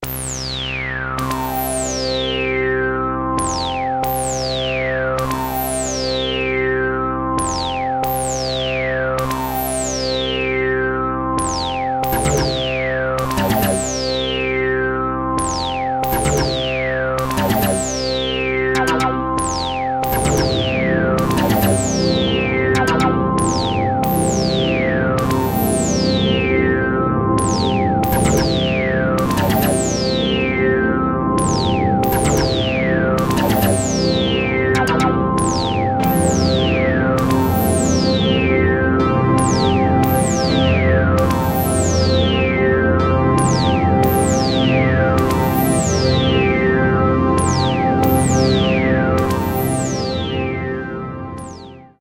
Short piece of music thought as an intro to a space series, animation or media project
ambience, atmosphere, music, sci